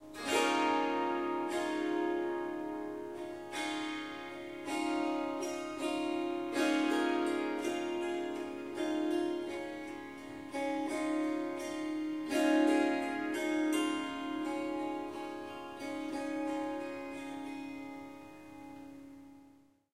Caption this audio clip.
Harp Melody 7
Melodic Snippets from recordings of me playing the Swar SanGam. This wonderful instrument is a combination of the Swarmandal and the Tanpura. 15 harp strings and 4 Drone/Bass strings.
In these recordings I am only using the Swarmandal (Harp) part.
It is tuned to C sharp, but I have dropped the fourth note (F sharp) out of the scale.
There are four packs with lots of recordings in them; strums, plucks, short improvisations.
"Short melodic statements" are 1-2 bars. "Riffs" are 2-4 bars. "Melodies" are about 30 seconds and "Runs and Flutters" is experimenting with running up and down the strings. There is recording of tuning up the Swarmandal in the melodies pack.